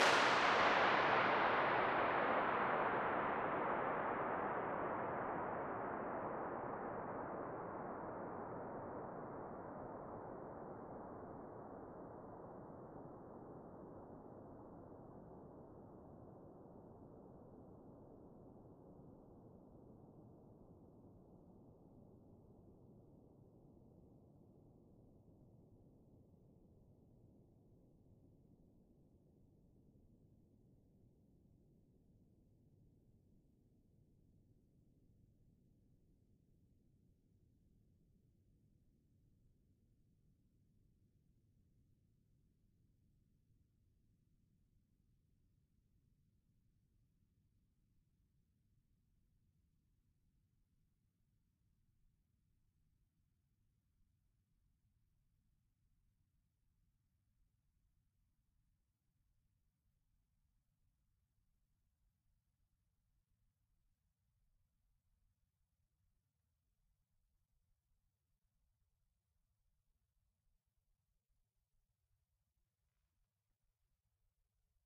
World's 'longest-echo' 3rd impulse
Measured for Sonic Wonderland/The Sound Book, this is an uncompressed impulse response from the space which holds the Guinness World Record for the 'longest echo'. It is a WWII oil storage tank in Scotland.
Allan-Kilpatrick, echo, guinness-world-record, inchindown, longest-echo, oil-storage, oil-tank, rcahms, reverb, reverberation, reverberation-time, Salford-University, scotland, sonic-wonderland, the-sound-book, trevor-cox, tunnel, uncompressed